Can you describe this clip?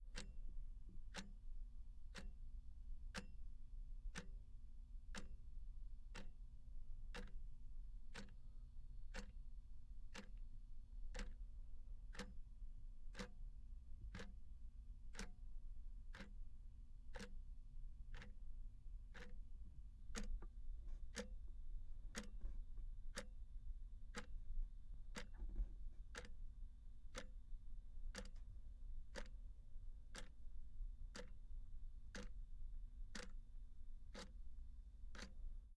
Clock Ticking 01
Recorded with an Aphex 207D and a Neumann TLM 103. Middle section dips out a bit due to gravity and the weight of the second hand, left it in just in case.
clock, tick, ticking, time